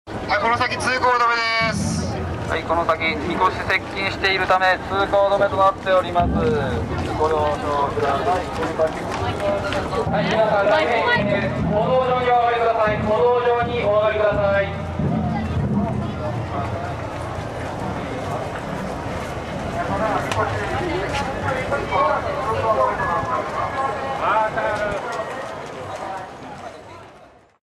Drums coming
A recording made during the Tennjin festival in Osaka Japan. The sound of distant pounding drums heard over announcements made by police on a loudspeaker.
field, recording